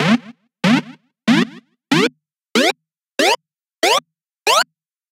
Done with a Synthesizer and some pitching